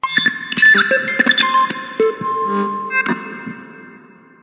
CASIO SA-5 Glitch 2
My beloved Casio SA-5 (R.I.P - burned during duty) after circuit bend. All the sounds in this pack are random noises (Glitches) after touching a certain point on the electrical circuit.
sa-5, Casio, circuit, circuit-bend, synth, bend